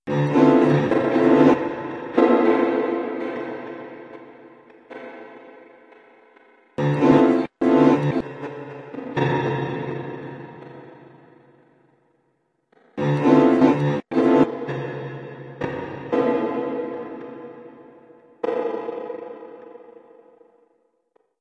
Piano hit processed with large, reverbed granulator chunks